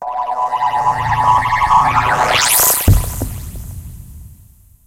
HITS & DRONES 02
broadcasting, Fx, Sound